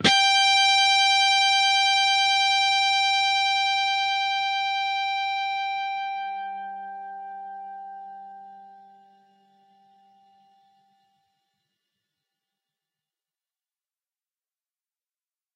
G (3rd) string, 5th fret harmonic.